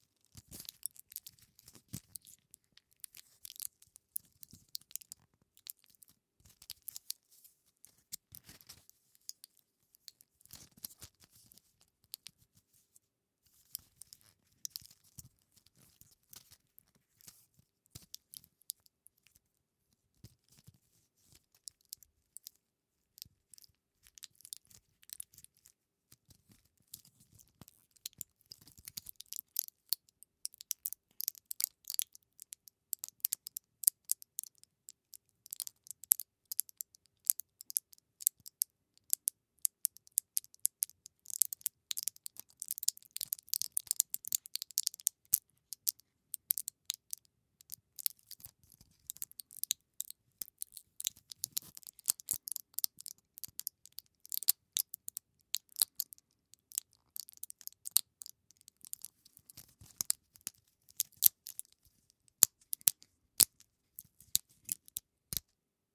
pillboardplastic handlingnoises tear
The board where the pills are held is handled. In the end the board is slowly teared thread by thread.
board, foley, handling, medicine, pill